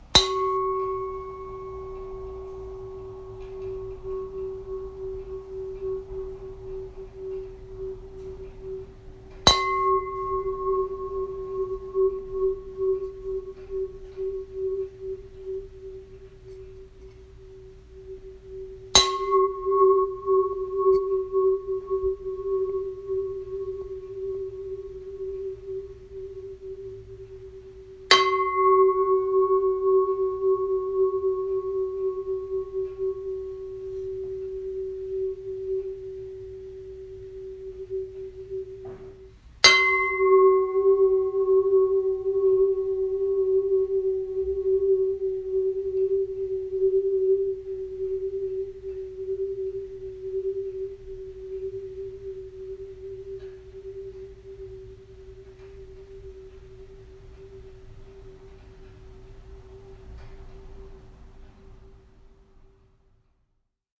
Knocking a pan lid producing a long sustain. Rotating the lid around the mic produced some interesting modulations.